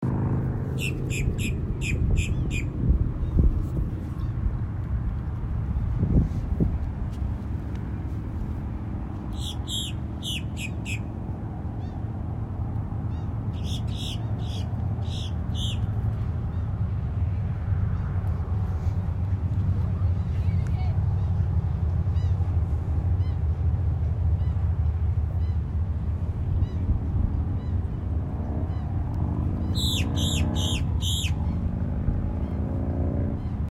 sound-aggressive bird calls at beach
Beach in Florida
beach, birds, aggressive, waves, field-recording